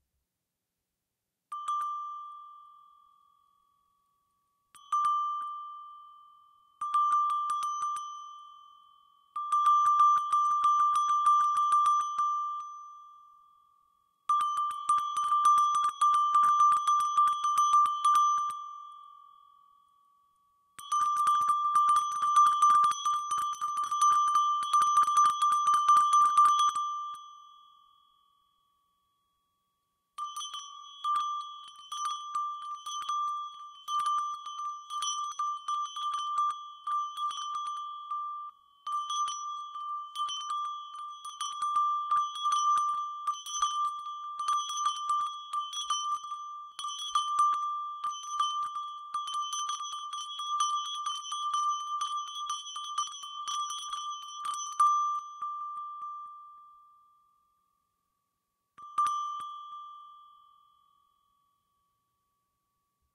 Iron Bell
Brass/Copper coated bell made from iron sheet Recorded over a Shure PG58 dynamic microphone and connected into Roland R-07. No windscreen on Mic, Gain 80, LowCut off.
First 30 seconds regular side to side jingling and second half the bell was flopped from vertical up to near horizontal and back to produce ring and ending with a singular regular ding at the end.
In the spirit of sounds being free I don't process, resample, tweak, add effects or modify sounds in post other than trimming for desired bits to leave the sample as open as possible for everyone to rework, sample, and process as they see fit for use in a
clanging, metal, chime, church, bell-set, bells, iron, locomotive, percussion, hit, bong, tubular, bell, ting, bell-tone, chiming, clang, metallic, ring, cowbell, gong, ping, steel, ding, ringing, dong